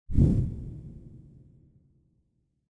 a low whoomp enriched with a verbness :)
soundefx, whoomp, whoosh